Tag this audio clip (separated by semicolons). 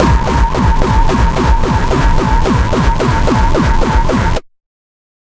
synthesizer; porn-core; lfo; bass; electronic; dance; trance; electro; bpm; techno; wah; loop; dub-step; Skrillex; sub; wub; rave; dub; effect; sound; noise; 110; dubstep; processed; beat; synth; club; wobble